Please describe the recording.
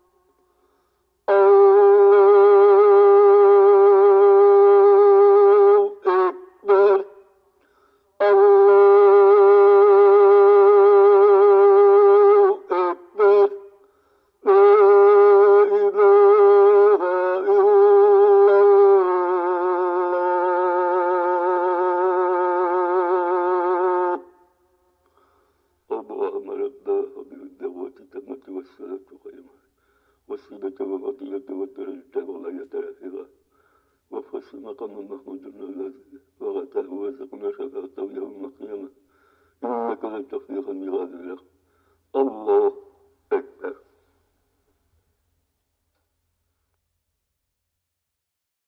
morning azan
Place: Bereske, Tatarstan, Russan Federaton.
Date: August 2012.
village, azan, human, nature, field-recording, voise